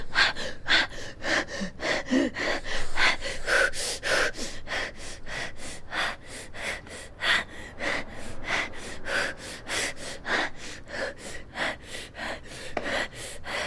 woman breathing heavily horror stress sex 2
woman breathing heavily horror stress sex
sex, stress, heavily, woman, horror, breathing